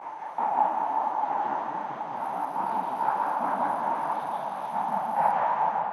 ns birds
Birds taking flight - a manipulation of a creaky door
abstract, created